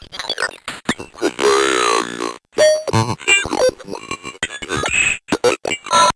DIGITAL PUKE. one of a series of samples of a circuit bent Speak N Spell.
bent, circuit, circuitbent, glitch, lo-fi, lofi, speak, spell